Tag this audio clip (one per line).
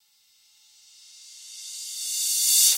cymbals
drums
crash
splash
cymbal
percussion
one-shot